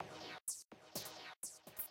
cientotreintaynueve63bpmtechnoloopbar1
63 beats per minute